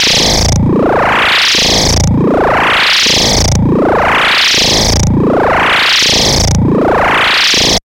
Experimental QM synthesis resulting sound.